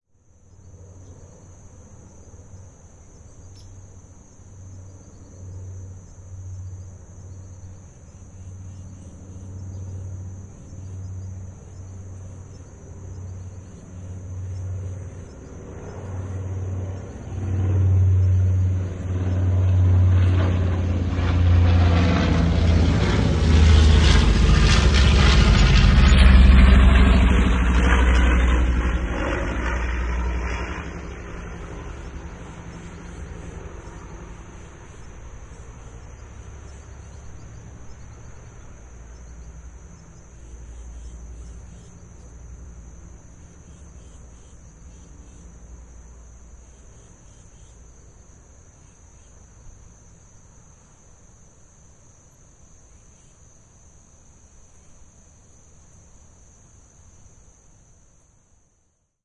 A recording of a WWII era twin engine bomber passing overhead from right to left. Nice long lead in and out, some birdsong, distant traffic.
mitchell, low-altitude, flying-overhead, bomber, wwii, b-25